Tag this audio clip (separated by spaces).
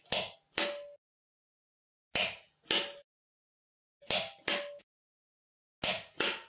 clicks bathroom-light pullswitch